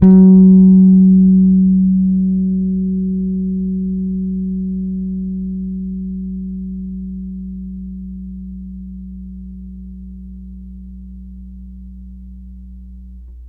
This is an old Fender P-Bass, with old strings, played through a Fender '65 Sidekick amp. The signal was taken from the amp's line-out into the Zoom H4. Samples were trimmed with Spark XL. Each filename includes the proper root note for the sample so that you can use these sounds easily in your favorite sample player.
p-bass, multisample, fender, bass, finger, string, sidekick